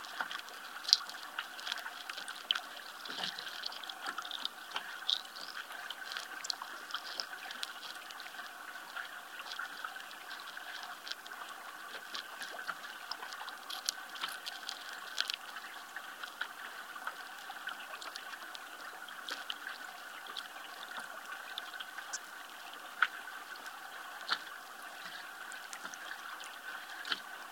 Mono extract from an underwater recording from the West coast of Scotland, on the mainland near the Isle of Skye. Some sounds of creatures rustling around near the mic and other unidentified activity. I don't know what animals make these noises, but I'd certainly like to... The loud click near the beginning is almost certainly some kind of snapping shrimp.
Hydrophone resting on the bottom of some shallow water.
Only processing is some amplification and conversion to mono 16bit. Recorded with JrF hydrophones and Sony PCM-M10.